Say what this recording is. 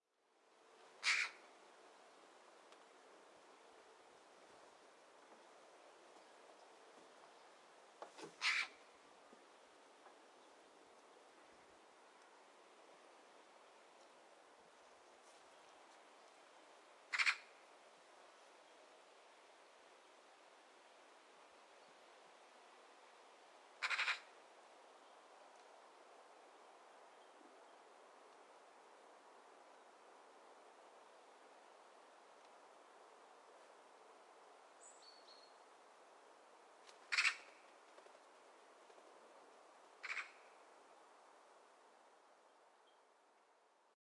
A screeching Magpie in the top of a birch
A magpie sitting high up in the birch and screeching.
Recorded wih;
Zoom H4n pro
Microphone Sennheiser shotgun MKE 600
Triton Audio FetHead Phantom
Rycote Classic-softie windscreen
Wavelab
bird, birds, birdsong, chirps, cm3, fethead, garden, h4n, magpie, nature, tweet, whistle, whistling